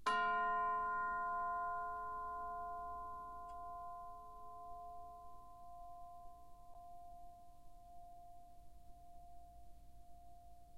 Instrument: Orchestral Chimes/Tubular Bells, Chromatic- C3-F4
Note: F, Octave 1
Volume: Piano (p)
RR Var: 1
Mic Setup: 6 SM-57's: 4 in Decca Tree (side-stereo pair-side), 2 close
bells, chimes, decca-tree, music, orchestra, sample